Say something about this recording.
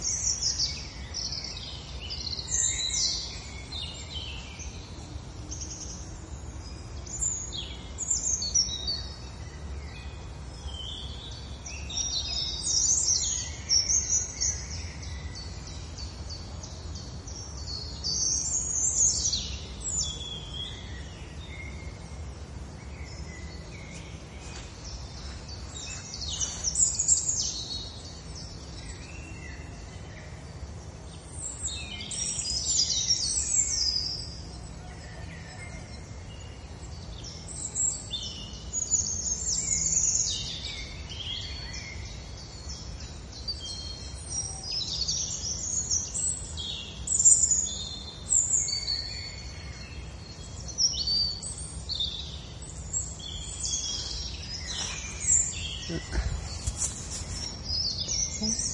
Birds in park near forest
A recording of mostly birds in a park near a forest. You can also hear a bell tower and people far away. This was recorded using the OnePlus One smartphone and edited in Adobe Audition.
field-recording, tower, clock